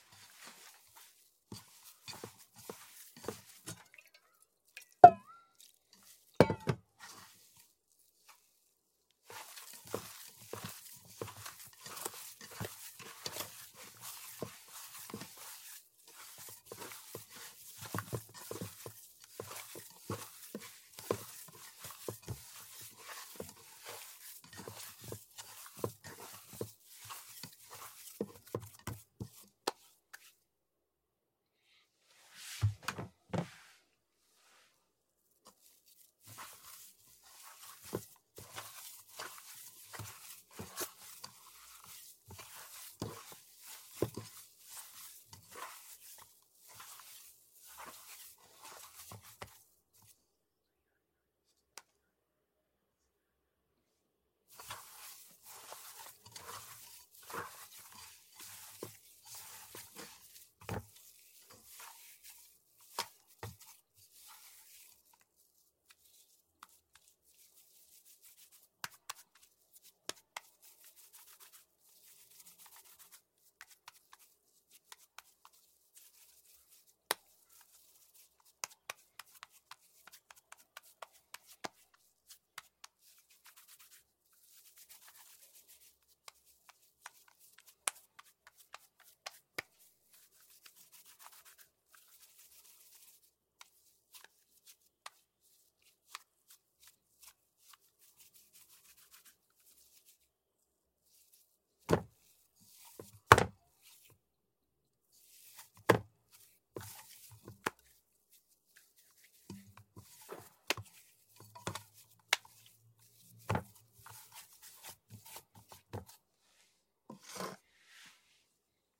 Kneading dough in metal pot
Performed foley recording of a person kneading or mashing corn dough in a metal pot.
Sennheiser 416 into Neve Portico, Reaper.
masa, maiz, amasando, table, pot, metal, olla, foley, hands, recording, knead, kneading, dough